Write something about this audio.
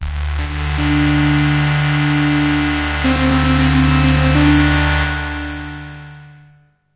Me direct rendering dramatic stabs and swells with the Neumixturtrautonium plugin for use in as scene transitions, video game elements or sample loops.